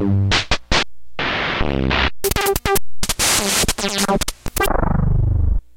A really destroyed beat from an old drum machine processed with Nord Modular and other effects.
synth, beat, idm, glitch, drum, digital, noise, sound-design, 808, modular